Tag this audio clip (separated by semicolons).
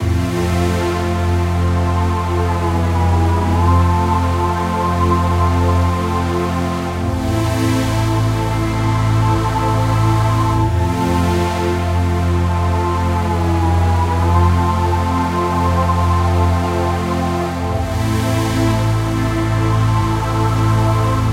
loop; music